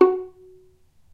violin pizz non vib F#3
violin pizzicato "non vibrato"
non-vibrato, pizzicato, violin